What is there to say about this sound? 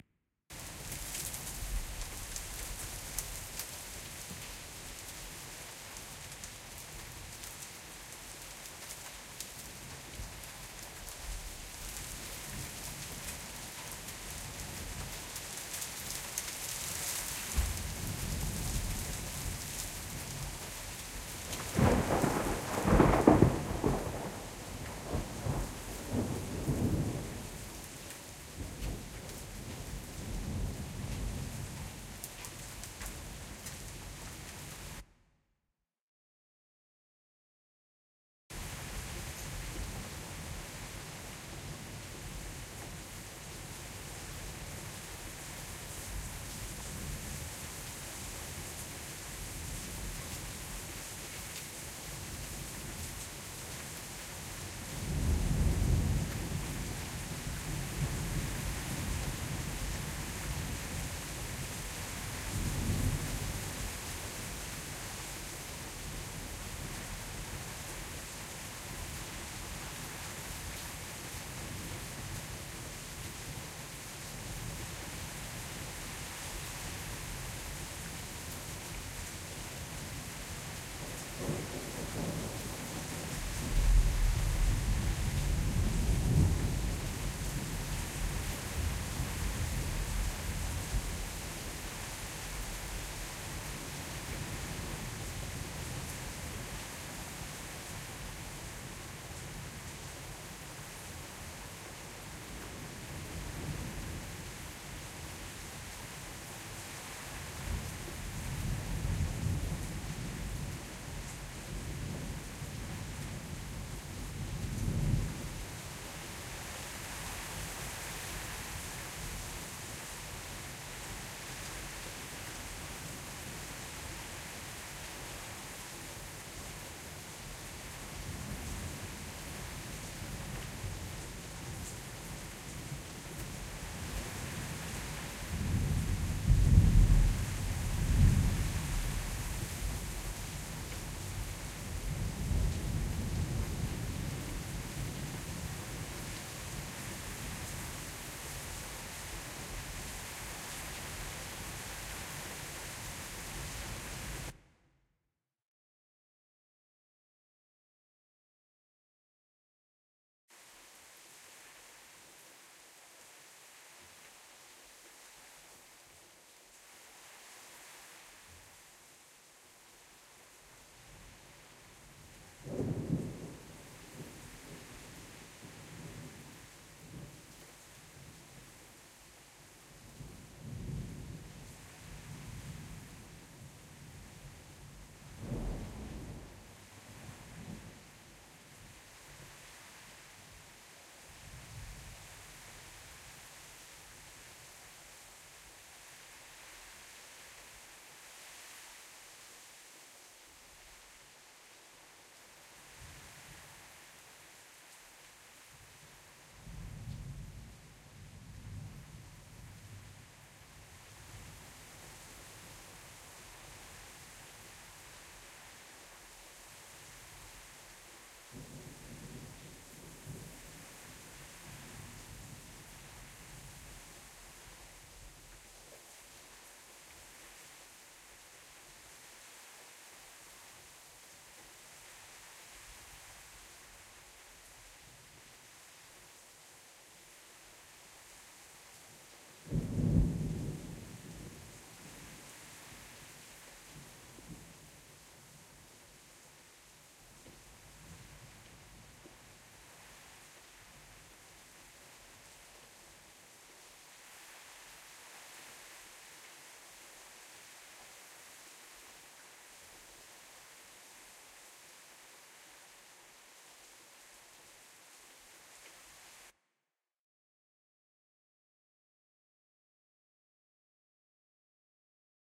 Rain Ambience Loopable With Thunder 3 Variation
ambiance, ambient, atmosphere, calm, rain, soft, softrain